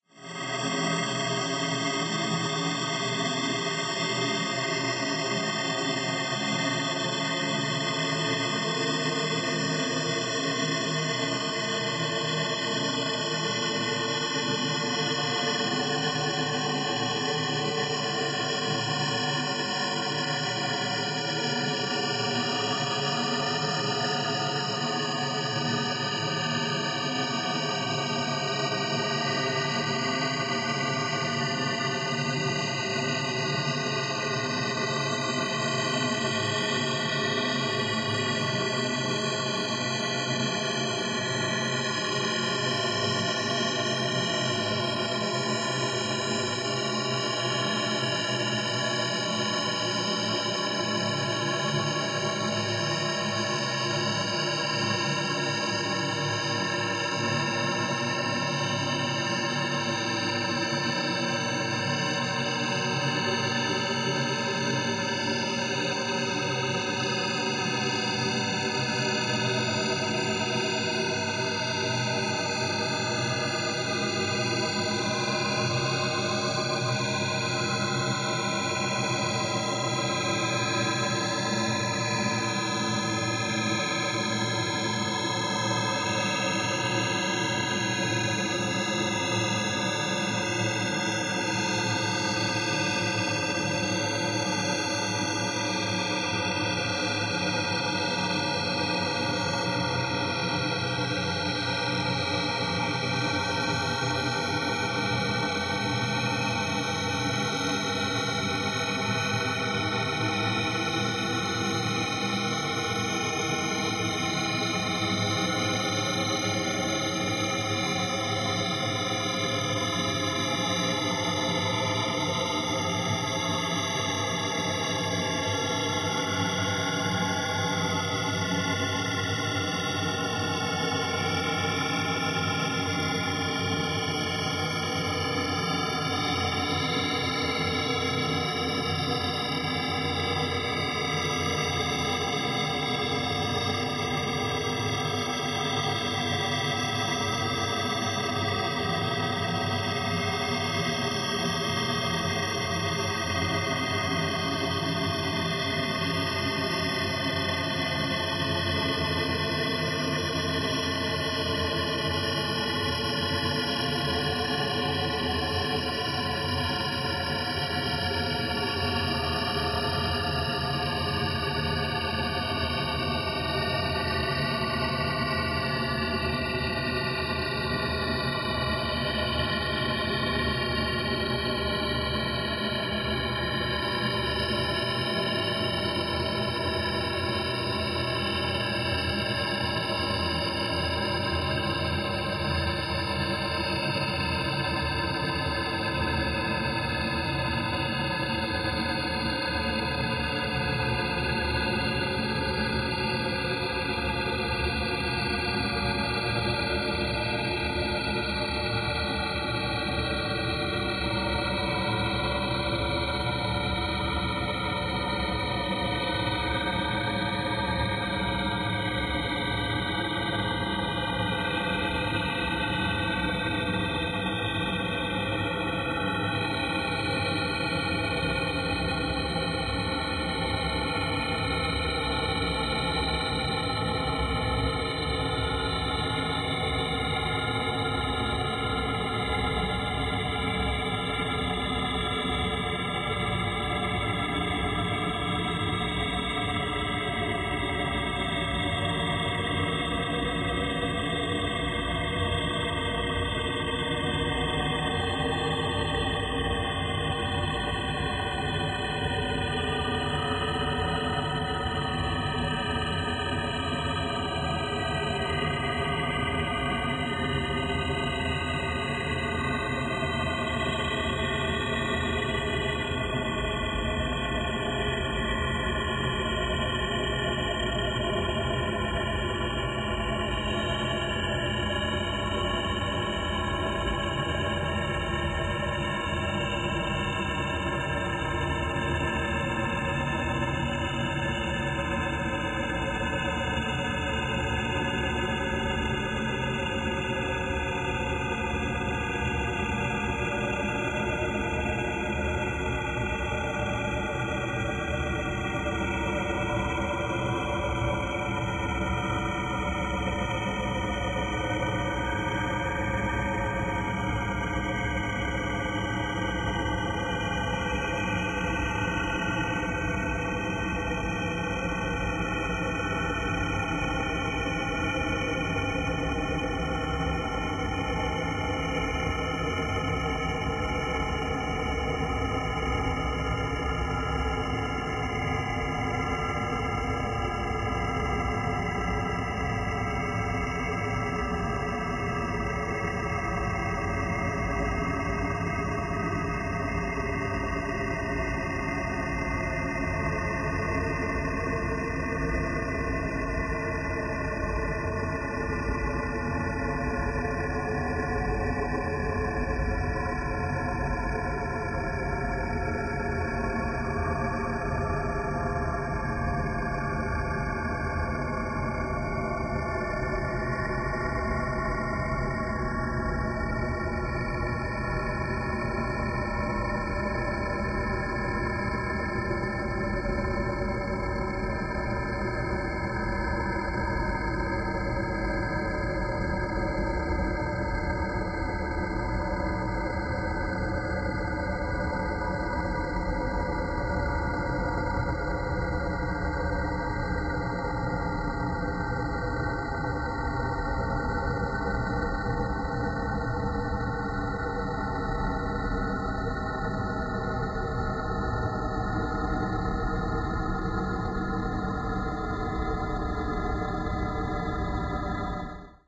greyfolds2bmp2
Longer sequences made with image synth using fractals, graphs and other manipulated images. File name usually describes the sound...